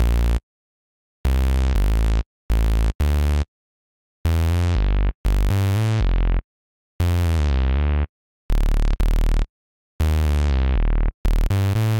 Queens Bass Loop 120bpm
analog, bass, electro, electronic, fat, loop, moog, noise, phat, synth, synthesis, tone